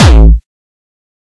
Distorted kick created with F.L. Studio. Blood Overdrive, Parametric EQ, Stereo enhancer, and EQUO effects were used.
bass, beat, distorted, distortion, drumloop, hard, hardcore, kick, melody, synth, techno